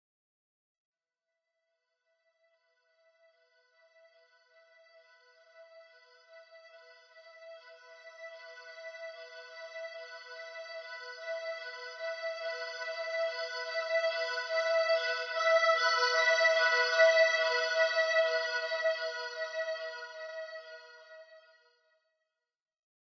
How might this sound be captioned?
Sound of an old emergency vehicle passing by created with blues harp and some FX.